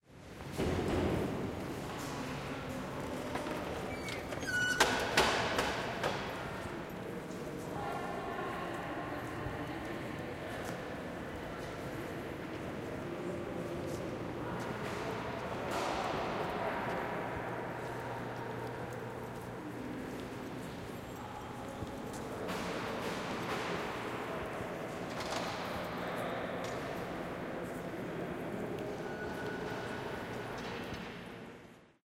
Train Station
Zoom H2N recording of Union station in Winnipeg, Manitoba, Canada.